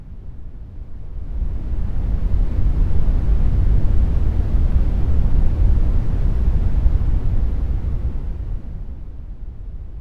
Bigwave to shallow 10 sec
A 10 second 'dark' wave sound, variation from approx 10 to 100 % volume, formed using Audacity. I wanted a more bass oriented type of sound, so I had to mess about a bit. This was initially brown noise, to which I applied the Equalizing curve 'RCA Victor 1947', then Normalized with a maximum amplitude of -1.0 dB
wind-noise-dark, rumble, artificial-wave, noise, electronic-wave, wave, relaxation, effects